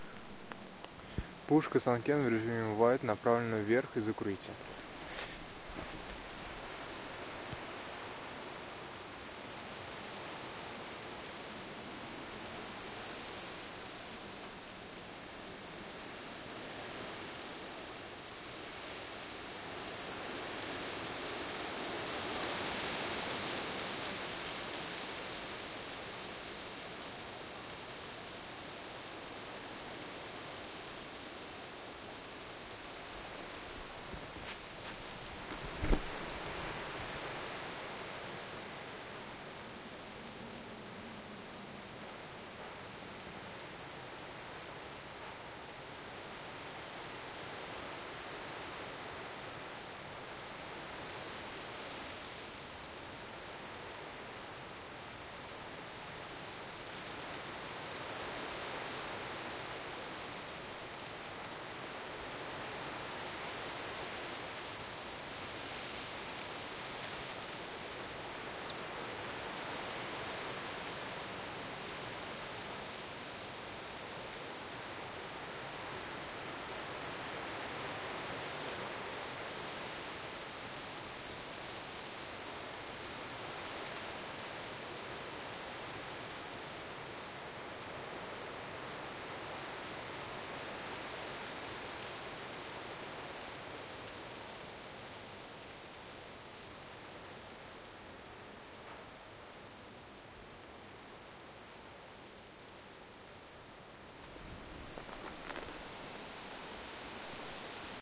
wind in the trees from cover
I directed the microphone to the top of trees while in the facade of the unfinished building
css-5, sanken, sound-devices, trees